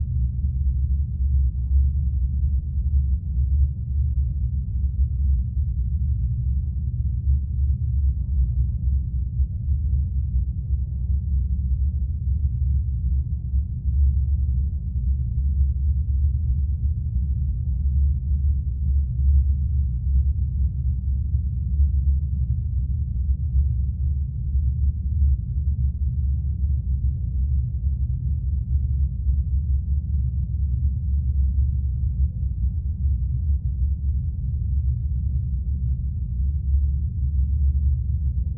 bass rumble metro subway tunnel
subway, metro, tunnel, rumble, bass